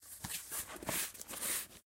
19 Cardboard Fingers On
cardboard, paper, box, foley, moving, scooting, handling,
box, handling, paper